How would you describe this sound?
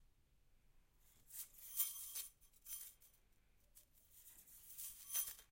This is the sound of someone playing with a metal slinky. Recorded with Zoom H6 Stereo Microphone. Recorded with Nvidia High Definition Audio Drivers.